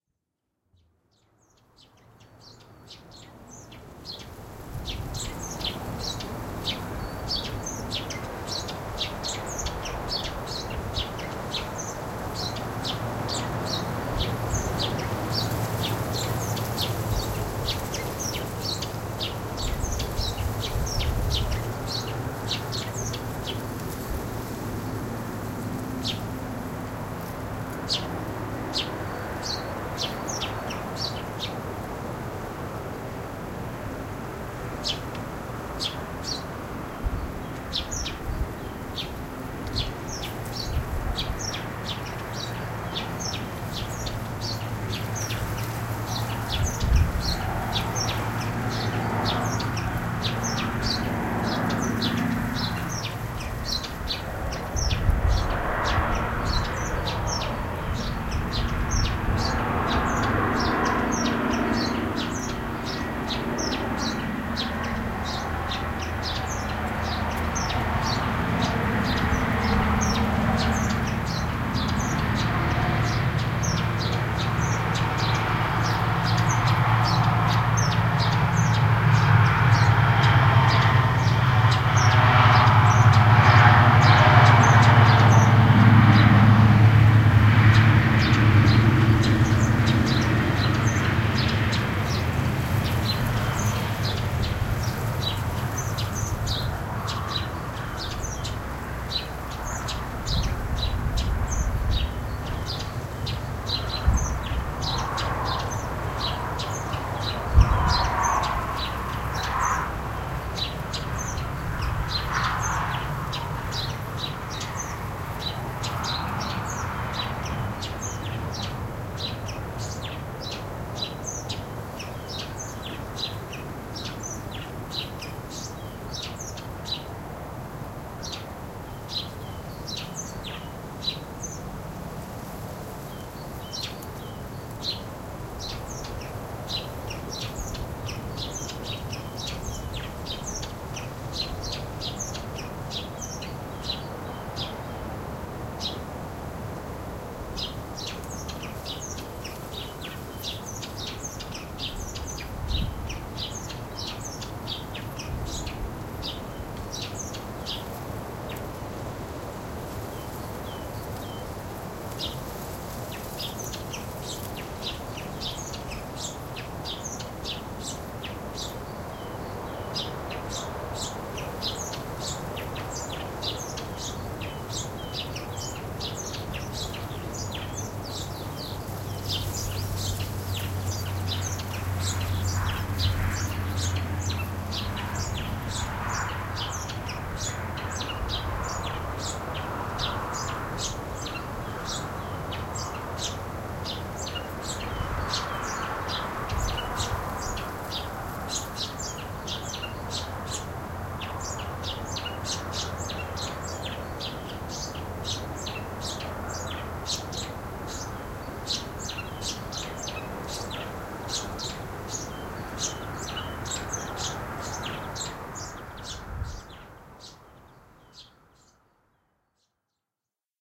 Sunny February afternoon, Røsnæs Kalundborg in Denmark. Garden surroundings. A single bird is singing, wind, bamboo bush weaves in the air, distant cars and a plane overfly. Recorded with Zoom H2 build in microphones.
airplane, ambience, ambient, bird, denmark, field-recording, garden, kalundborg, nature, plane, soundscape, wind